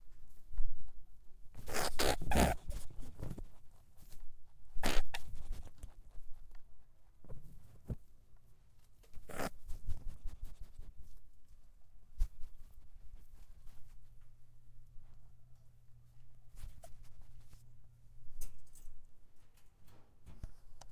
Female rabbit
Tascam DR-07MKII
pet, creature, bunny, rabbit, growl